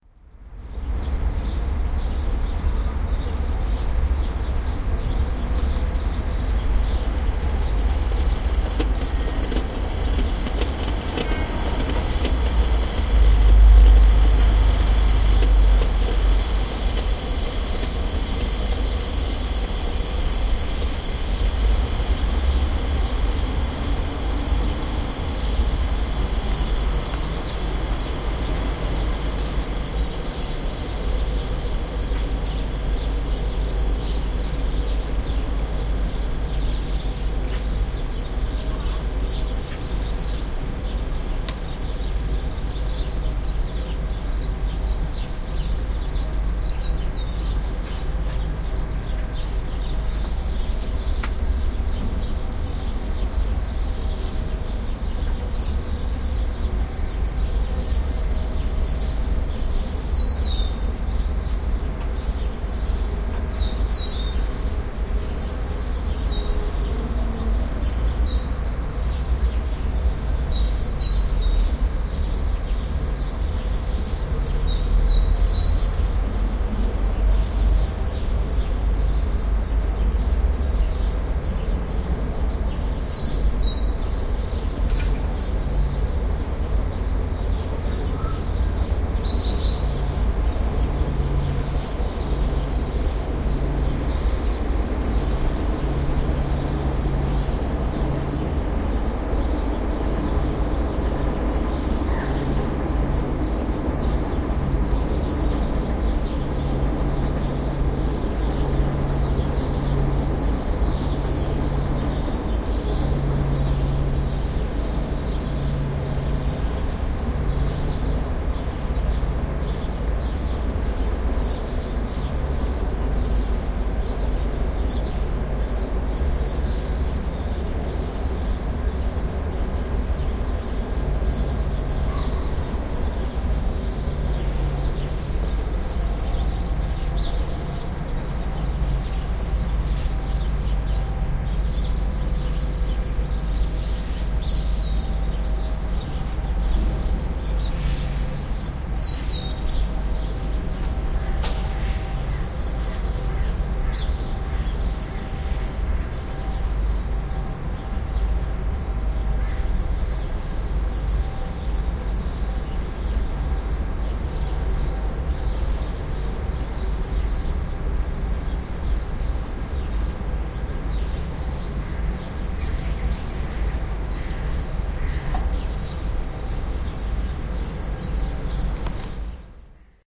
marv sound mix 1
Have mixed the following samples together - kettle boiling by ERH, and an urban morning noise (which I can longer seem to find on here). They are both from this site.